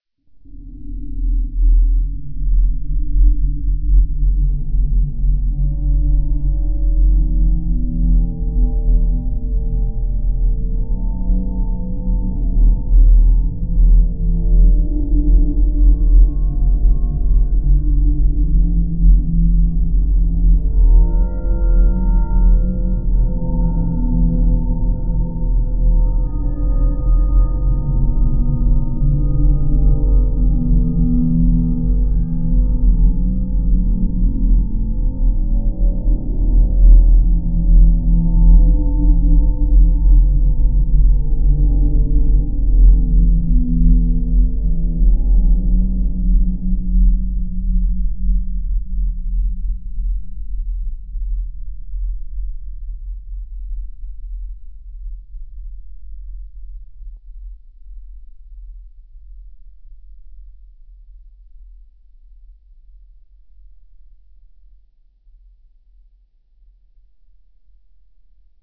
Internal Chemistries
The main sound is the sound of someone playing a thumb-piano. There's also a gong, and two clock chimes. All of these I added reverb to and sloooowed dooooown about -81 percent. I think it sounds like the interior of a giant clock, but call it what you will. This sound is free, anyway. Made with Audacity.